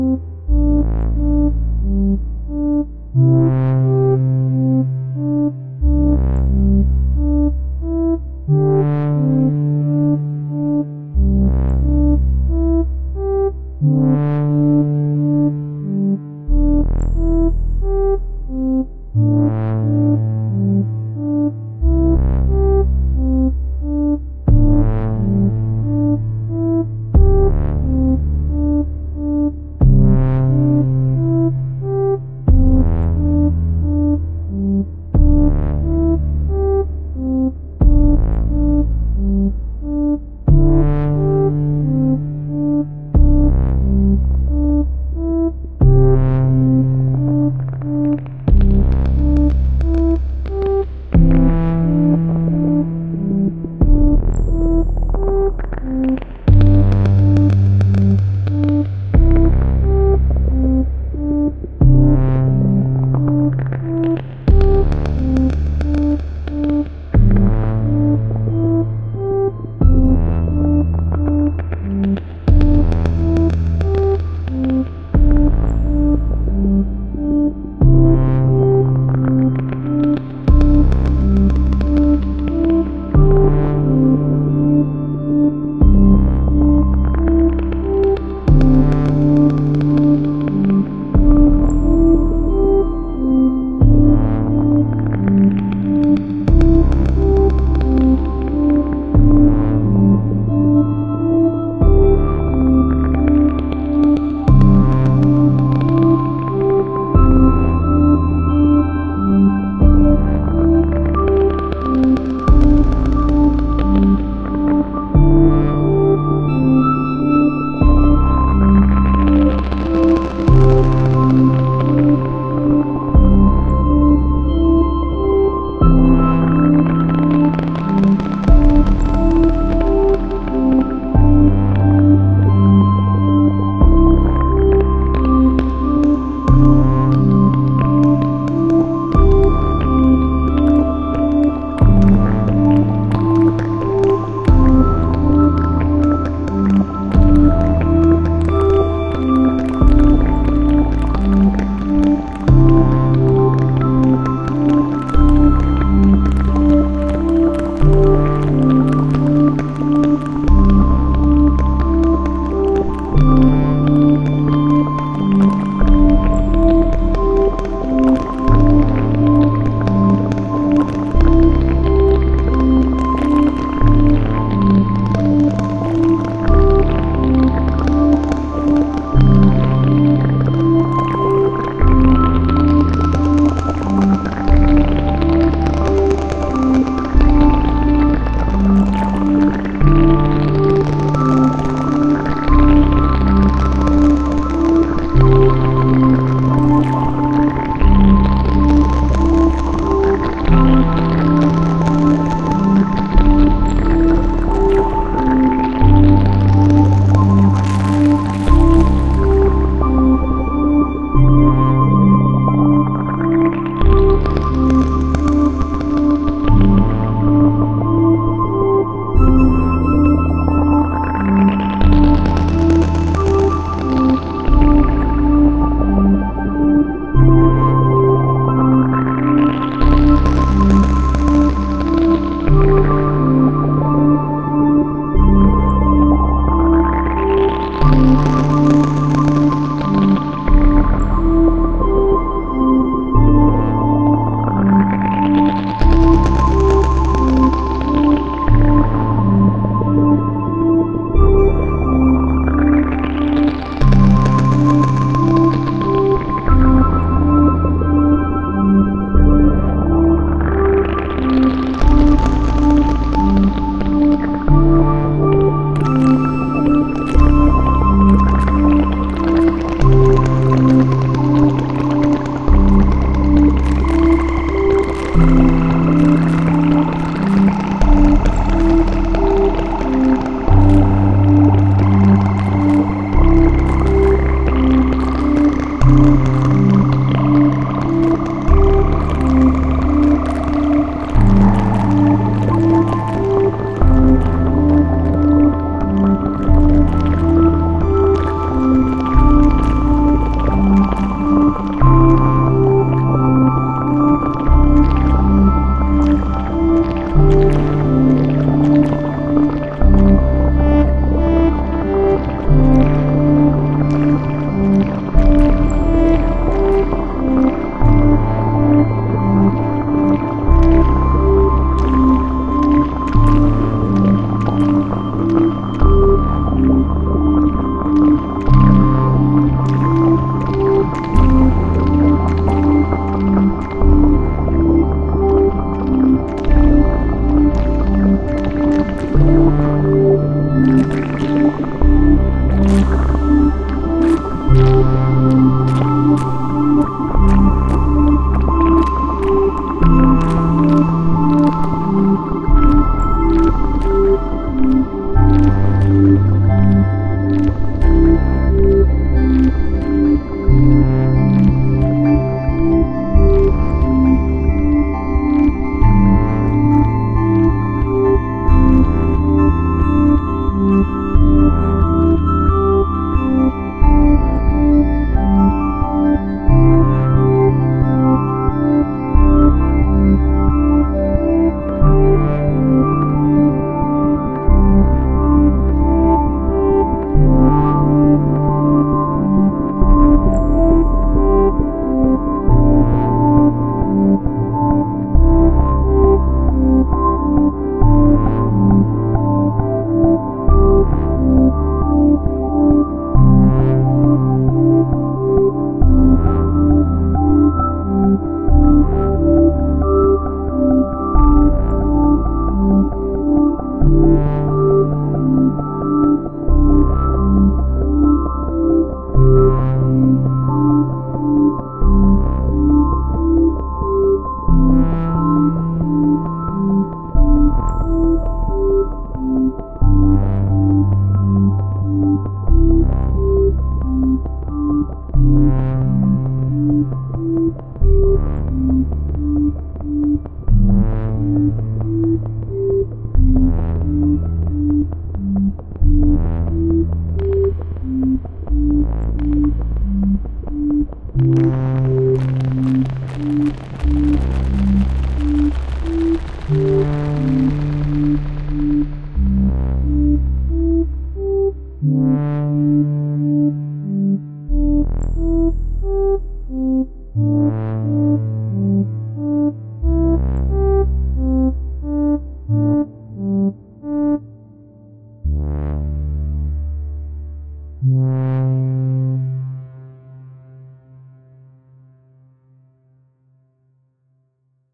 BCO - tram ride on a sunny day - 2022-08-12
A drifting melodic patch with filtered percussion.
ambient, drone, experimental, idm, melodic, modular, rack, relax, relaxing, soundscape, synthesis, vcv